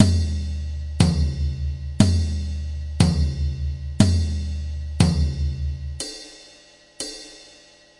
tomtom ride 120bpm
120bpm, beat, quantized, drum, ride, tomtom, rhythm, drums, loop